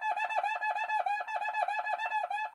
Different examples of a samba batucada instrument, making typical sqeaking sounds. Marantz PMD 671, OKM binaural or Vivanco EM35.
brazil, drum, groove, pattern, percussion, rhythm, samba